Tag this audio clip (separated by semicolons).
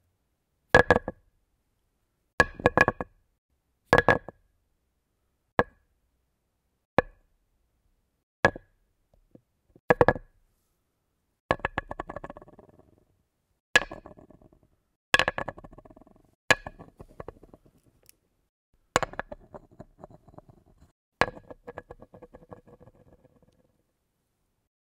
caida; close-up; drop; impact; madera; wood